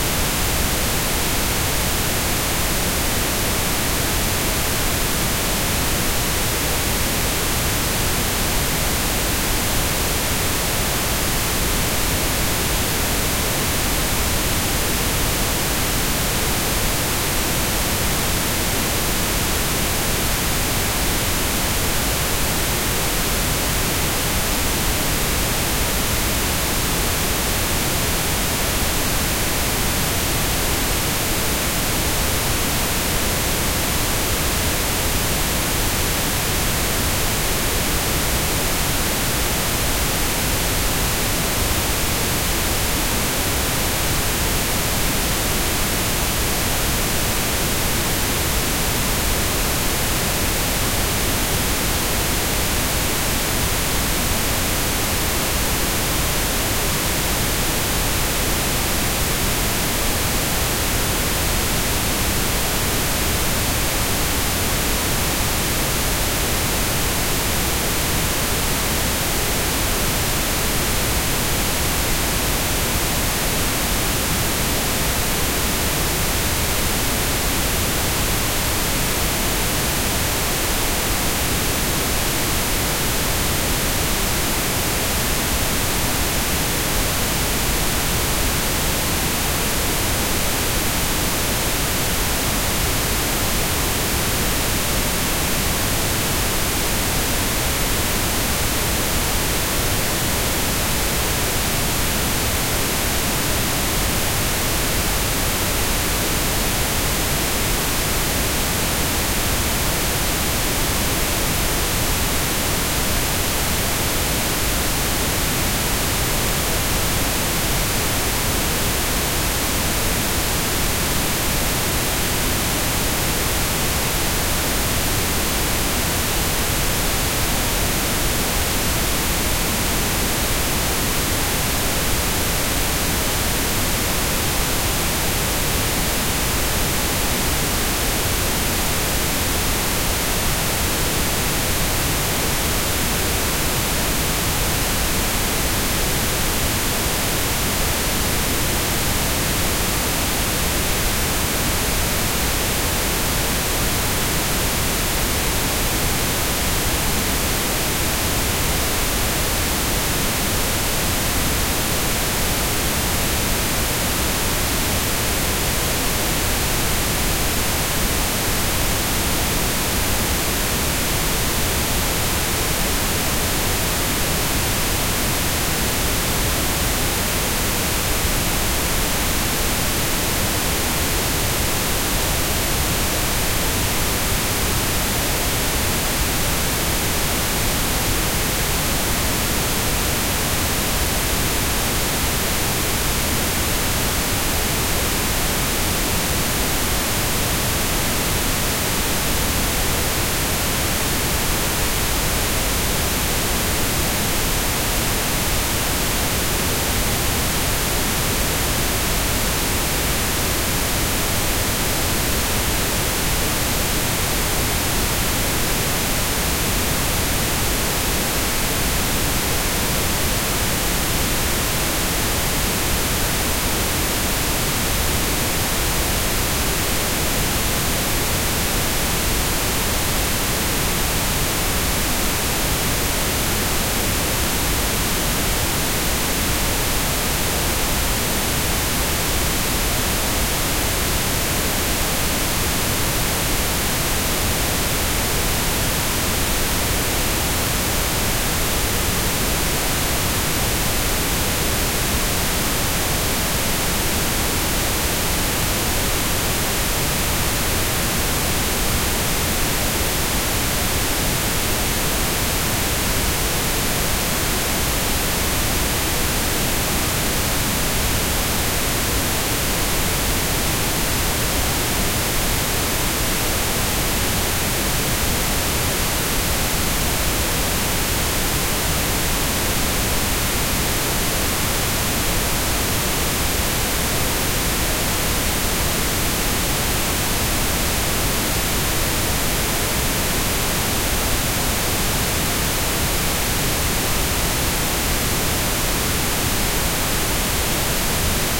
velvet pink noise
pink noise - low pass filtered: 3 dB/octave
band-limited, pink-noise, velvet-noise